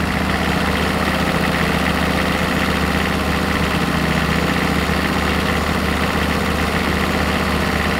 S009 Engine Low Rev Mono
Engine noise at a low trottle rev